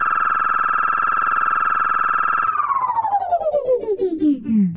Flying Car - Full Stop Fly
AUDACITY
For left channel:
- Cut silence before (0.000s to 0.046s), middle (0.096 to 0.228), and after (0.301 to 0.449) sound
- Cut middle part 0.130 to 0.600
- Effect→Change Speed
Speed Multiplier: 0.800
Percent Change: –20.000
- Effect→Equalization
(18 dB; 20 Hz)
(18 dB; 800 Hz)
(–18 dB; 2000 Hz
(–26 dB; 11 000 Hz)
- Effect→Change Speed
Speed Multiplier: 1.700
Percent Change: 70.0000
- Effect→Repeat…
Number of repeats add: 70 (50 for fly, 20 for stop fly)
Select repeats 30 to 50 (2.464s - 3.431s)
- Effect→Sliding Time Scale/Pitch Shift
Initial Temp Change: 0%
Final Tempo Change: –50%
Initial Pitch Shift: 0%
Final Pitch Shift: –50%
- Effect→Sliding Time Scale/Pitch Shift
Initial Temp Change: 0%
Final Tempo Change: –50%
Initial Pitch Shift: 0%
Final Pitch Shift: –50%
- Effect→Sliding Time Scale/Pitch Shift
Initial Temp Change: 0%
Final Tempo Change: –50%
Initial Pitch Shift: 0%
Final Pitch Shift: –50%
For right channel:
- Tracks→Add New→Mono Track
- Copy left track and paste at 0.010 s